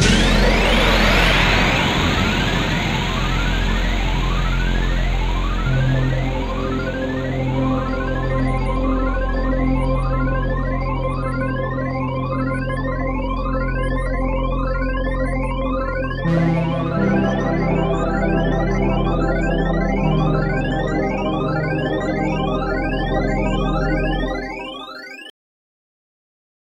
Cool sound created on an old Korg NX5R sound module.